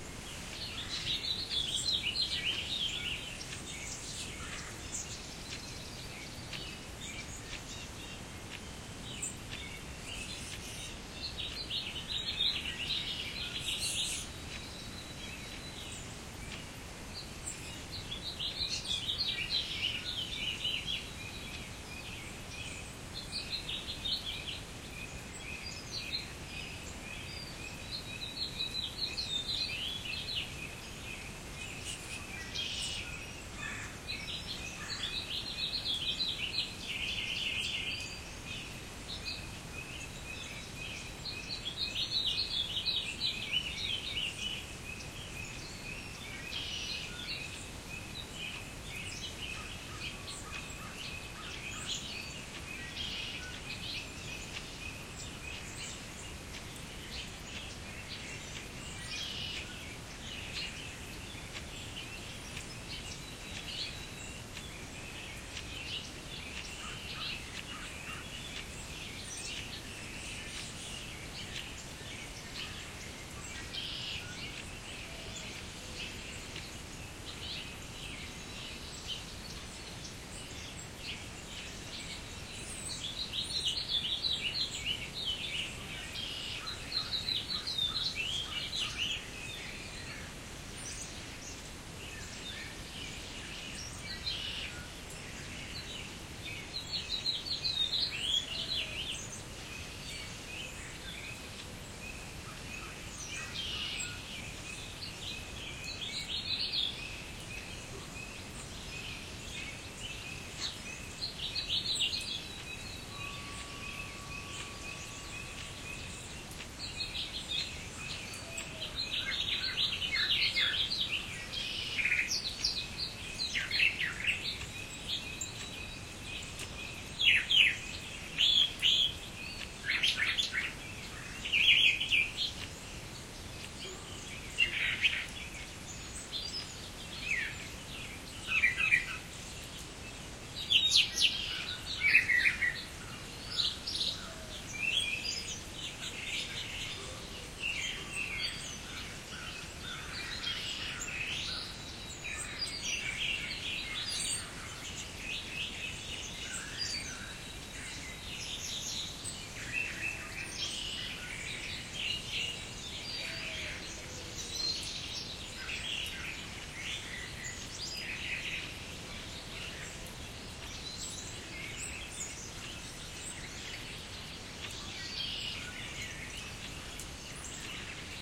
House finch, Crow, Mockingbird, Doves
wildlife, bird